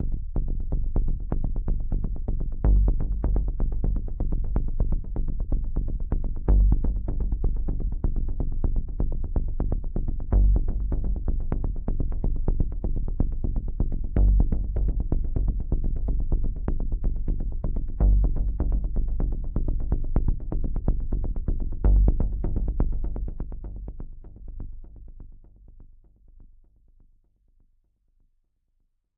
Guns in the parking lot Bass Loop
Cinematic bass synth pad.
drama, cinematic, parking, suspenseful, bed, Bass, Film, criminal, Bass-Synth-Loop, bakground, Loop, Movie, thriller, Synth, Score, pad, fear, tense, violence, warning, gank, tension, suspance, guns, danger